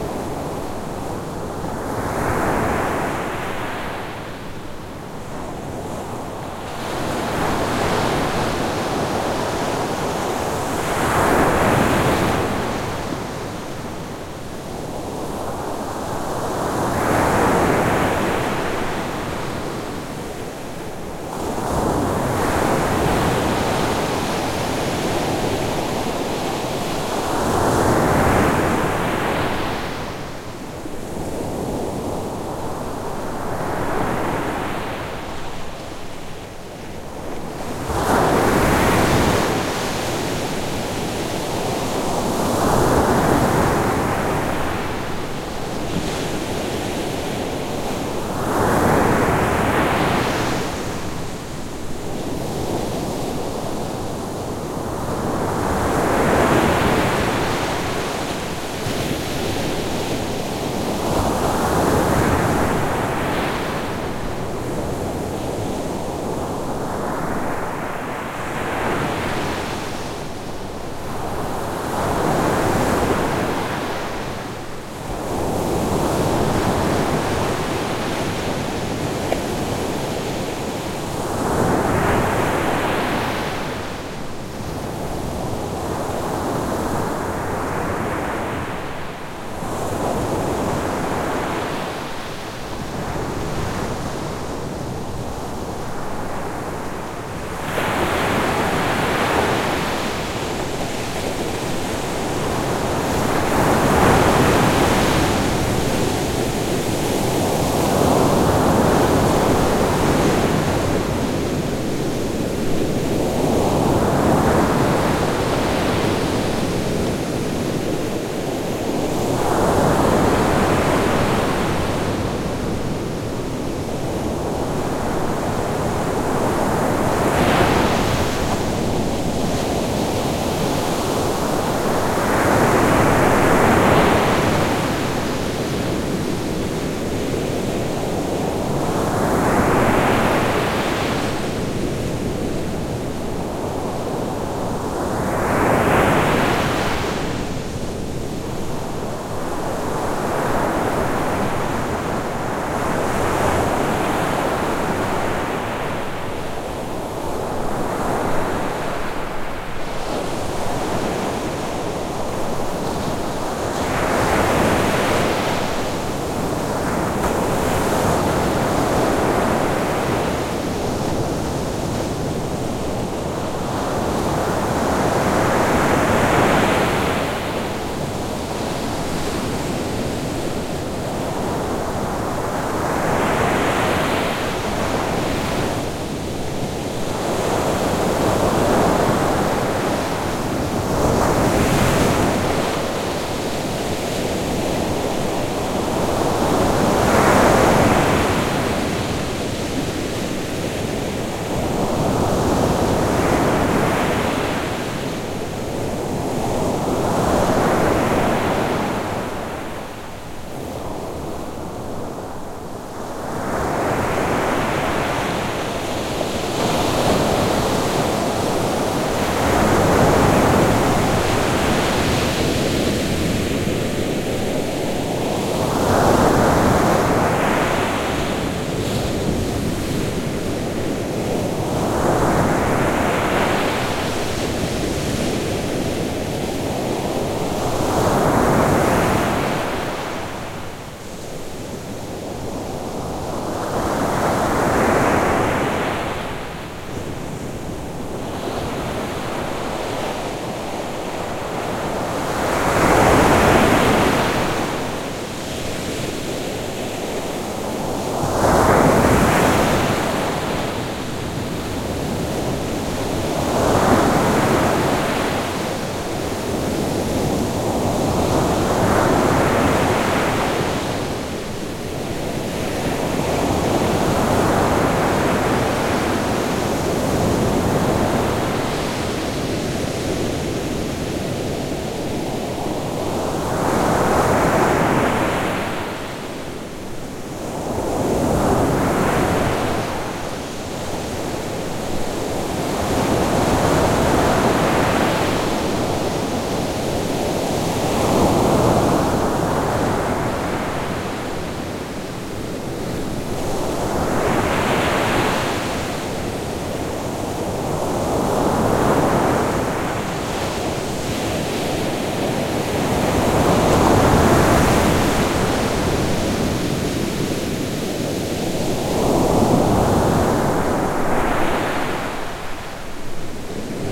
Waves, big. 3m distance
Big waves at 3m distance
Beach, Greece, Sea, Waves